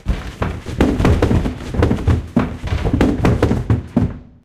Falling Down Wooden Stairs
A stereo foley of someone falling down a flight of wooden stairs.
fall, falling-down-stairs, request, stairs